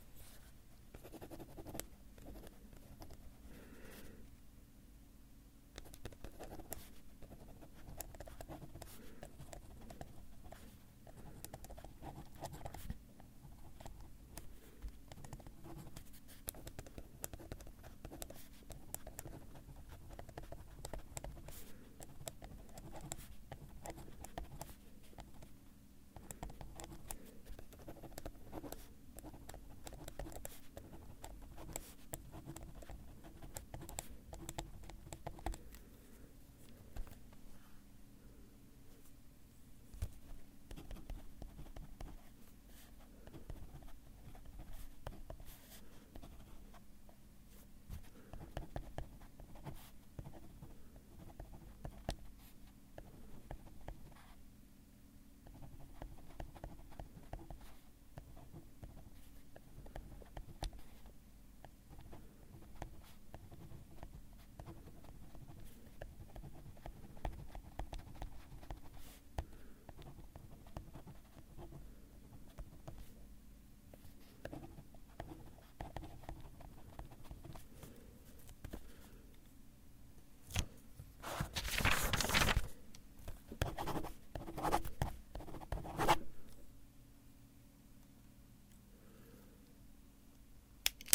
Pen scribbling. Recorded with a Neumann KMi 84 and a Fostex FR2.